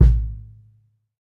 Part of "SemiloopDrumsamples" package, please dl the whole package.. With 'semilooped' I mean that only the ride and hihat are longer loops and the kick and snare is separate for better flexibility. I only made basic patterns tho as this package is mostly meant for creating custom playalong/click tracks.
No EQ's, I'll let the user do that.. again for flexibility
All samples are Stereo(48khz24bitFLAC), since the sound of the kick naturally leaks in the overheads and the overheads are a big part of the snare sound.
acoustic, drums